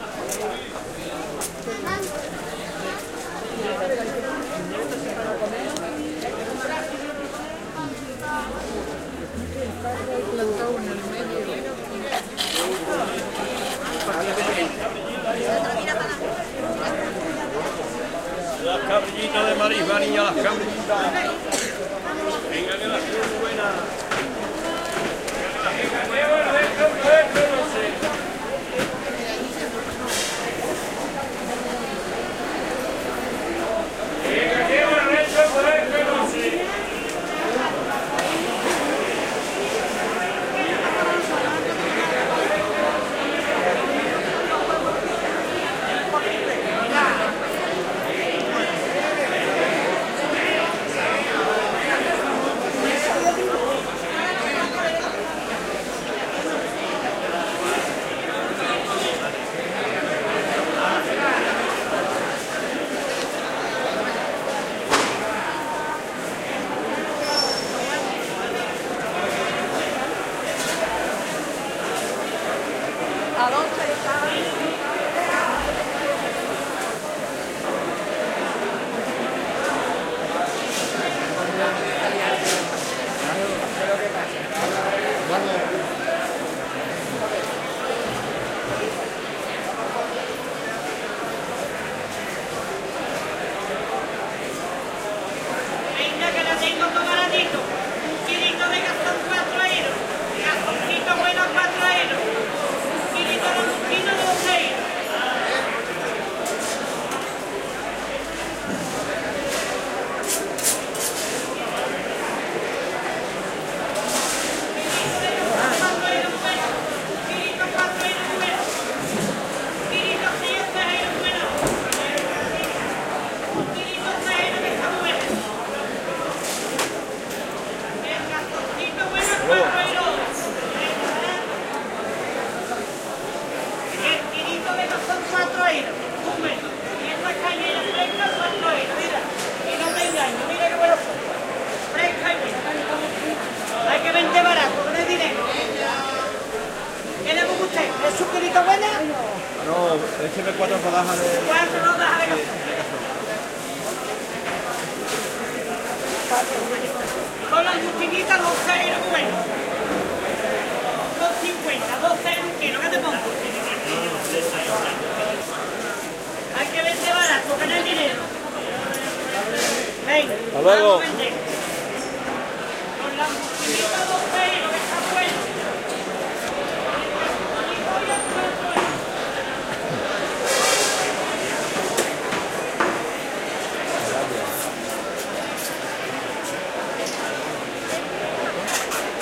20101121.sanlucar.market
voices speaking in Spanish, and general ambiance in a fish market at Sanlucar de Barrameda, Cadiz, S Spain. Shure WL183 into Fel preamp, Olympus LS10 recorder
spanish, ambiance, sanlucar, market, field-recording, voice